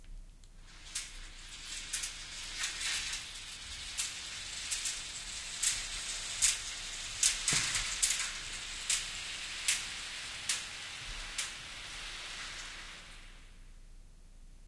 this is a slow pull of the scrim across the stage
This is a recording of a person running across the stage pulling a scrim that was hung on a track so we could divide the stage. The sound was so distinctive that I decided to record it in case i wanted to use it for transitions and blackouts.
This is part of a pack of recordings I did for a sound design at LSU in 2005.
curtain, metal, pull, scrim, track